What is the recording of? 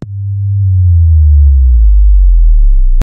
EMP Blast
The term electromagnetic pulse (EMP) is a burst of electromagnetic radiation that results from an explosion.
blast, descending, electromagnetic, emp, pulse, wave